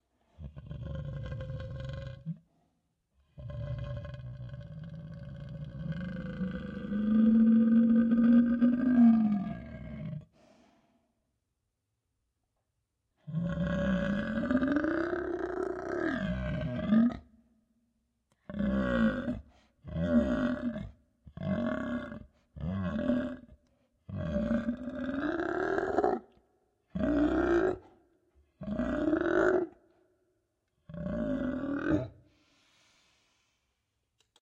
Loud cry of a dragon or monster